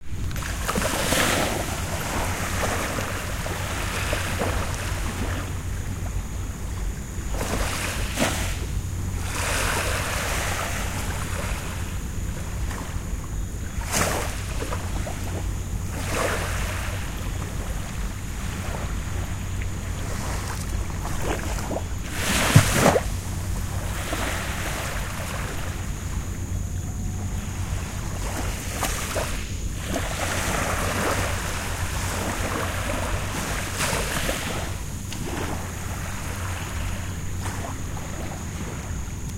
Small waves on the shore of the Seven Mile Beach in Negril, Jamaica. Some background insect noise.
beach, island, waves